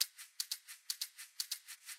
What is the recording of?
rice pill shaker
pill shaker loop zoom h1 edited into loop in reaper